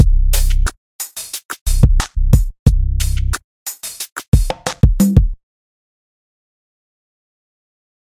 RnB beat
Just a basic beat for any music :D
bass, beat, logic, pro, snare